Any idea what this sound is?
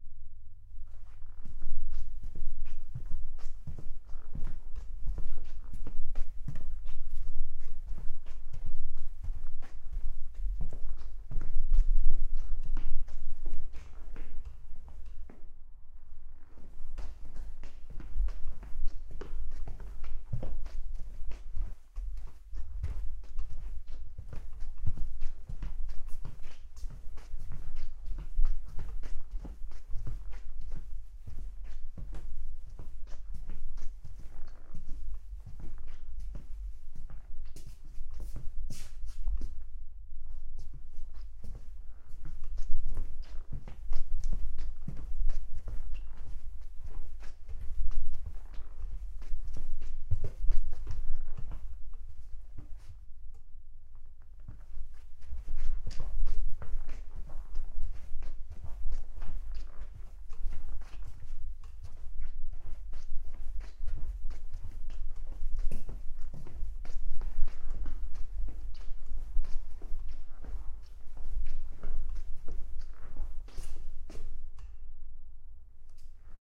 walking around in the room
walking around the microphone and a bit away in a room having shoes on.
floor, room, shoes, steps, walk